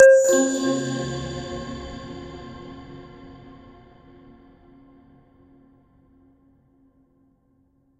SFX Welcome
Fresh SFX for game project.
Software: Reaktor.
Just download and use. It's absolutely free!
Best Wishes to all independent developers.
automation, bionic, free-game-sfx, interface, robotic, windows